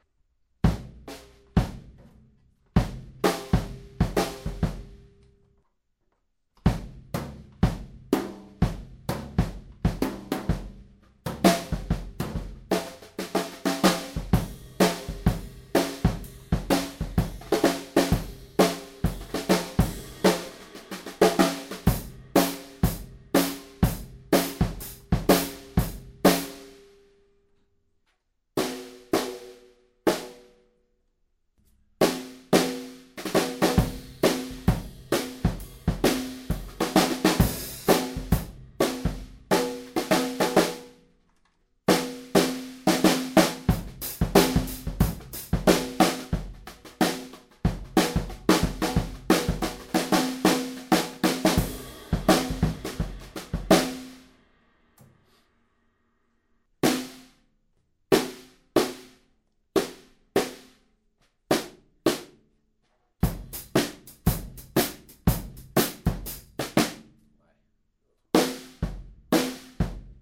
Gretsch snare + Ludwig kit - 2 - shuffle and hip hop
Some drum beats and fills played with "hot rods" for a sound inbetween sticks and brushes. Gretsch maple snare 14x6.5 with no damping material so lots of ring and tone, Ludwig drum kit with lots of tone in the kick. Bosphorus cymbals.
Grab bag.